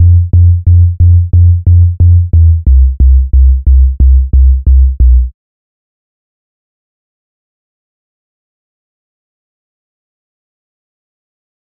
16 ca bassline
These are a small 20 pack of 175 bpm 808 sub basslines some are low fast but enough mid to pull through in your mix just cut your low end off your breaks or dnb drums.
bass, beat, dnb, drum, dubstep, jungle, loop, low, sub, wobble